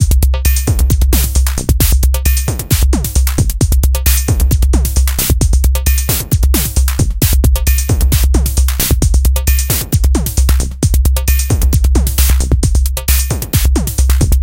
133 bpm ATTACK LOOP 04 electrified analog kit variation 13 mastered 16 bit
This is loop 13 in a series of 16 variations. The style is pure
electro. The pitch of the melodic sounds is C. Created with the Waldorf
Attack VSTi within Cubase SX. I used the Analog kit 2 preset to create this 133 bpm loop. It lasts 8 measures in 4/4. Mastered using Elemental and TC plugins within Wavelab.
133bpm, drumloop, electro, loop